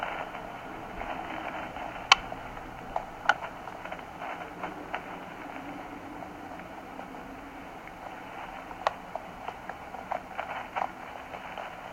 walkie talkie static
static, talkie, walkie